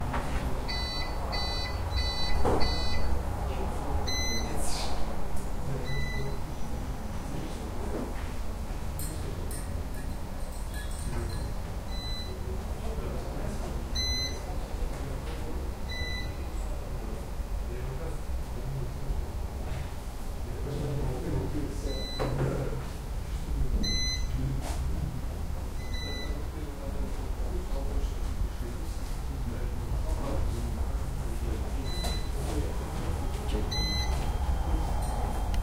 Office and UPS sounds 4
Suddenly electricity was lost in the office and UPS start to squeak.
Recorded 2012-09-28 03:15 pm.
AB-stereo
squeak, UPS-sound